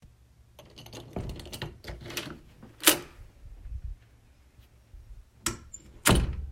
Opening and closing door
sopening and closing a door